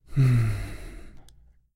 12.5-Mmm(romantico)
Una persona haciendo un Mmmmm
Mmmm, Pensando, Thinking